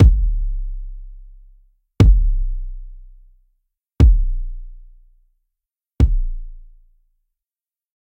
Four variations of the kick drum, high to low intensity.
bass-drum, bassdrum, kick, kick-drum